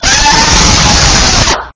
A woman screaming.